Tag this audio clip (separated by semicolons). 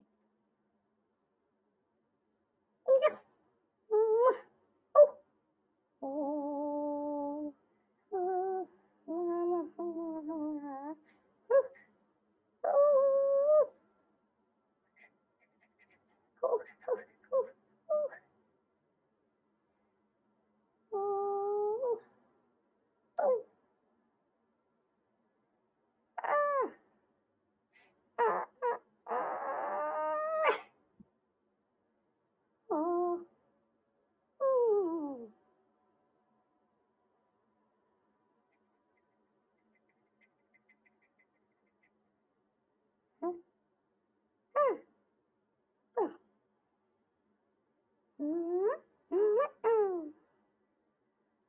Desert; cat; distress